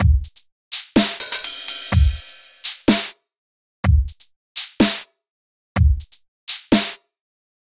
beat125bpm
125, bpm, drumloop, loop, Maschine